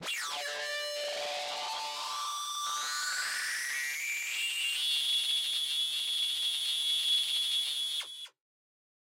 Flo fx xvi
made with an access virus ti
access, fx, synth, ti, virus, virusti